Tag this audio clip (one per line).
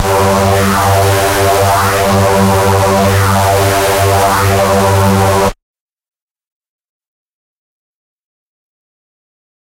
distorted
hard
processed
reese